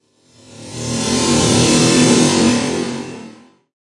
uplift, time-stretched, processed, cinematic, ableton live, soundhack
ableton, cinematic, live, processed, soundhack, time-stretched, uplift